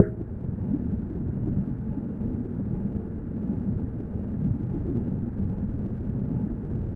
1108 CLEAVER-D#-1-TMc
samples have note mapping data embedded in them and will automatically map to the proper keyboard keys in a sampler. Europa's wavetable technology enables sounds to span a wider range than straightforward sampling technology without "munchkinization". samples are looped to play indefinitely. thank you sugu14 for such nice samples to work with!
hit, metal